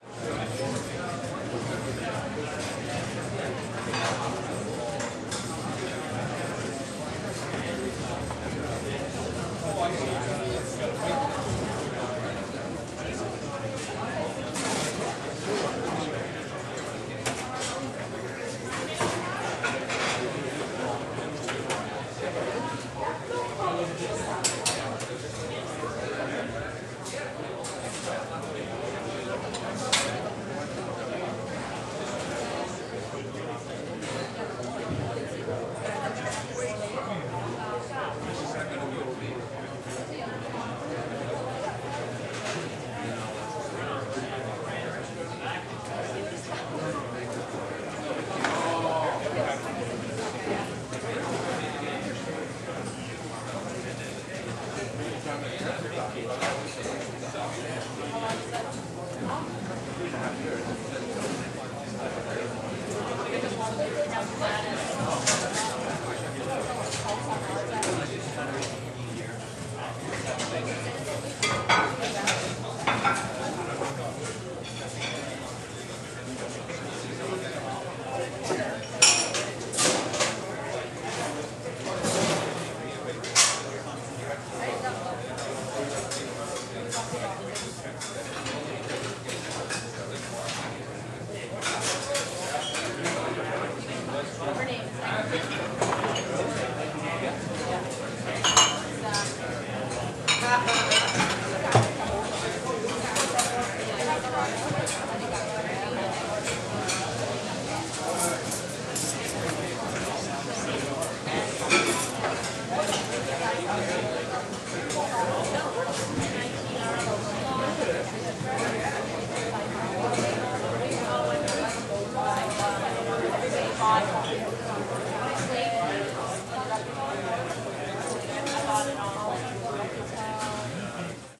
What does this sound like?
Restaurant Busy
Busy, Crowd, Restaurant